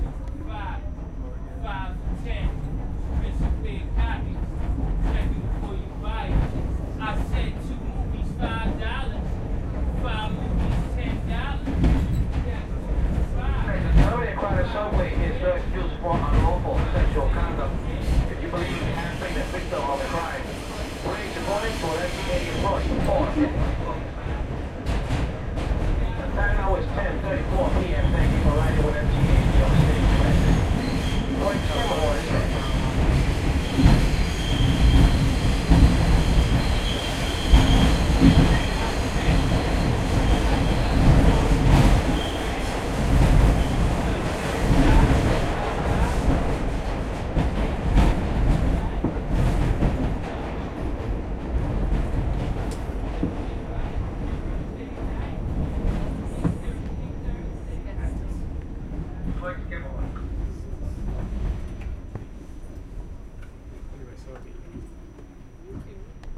Subway Interior Chatter Amb 03
Subway interior car chatter, operator announcement, reporting misconduct, male voice
NYC, H4n, Zoom, field-recording, subway, MTA